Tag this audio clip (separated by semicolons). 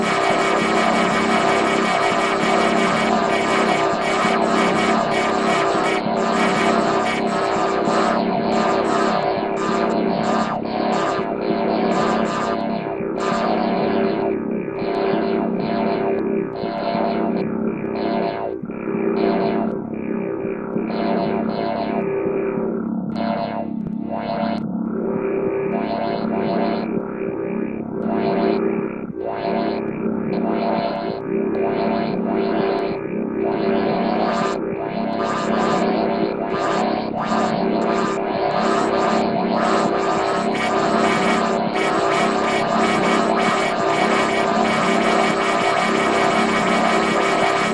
Sound; Ambient; Sci-Fi; Background; game; Dub; track; project; Strange; Dubstep; Electronic; cartoon; Weird; video; Alien; Funny; Effect; Machine; Audio; Spooky; Noise